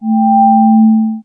slobber bob A3
Multisamples created with Adsynth additive synthesis. Lots of harmonics. File name indicates frequency. A3
additive, bass, bob, free, metallic, multisample, sample, sampler, slobber, sound, swell, synthesis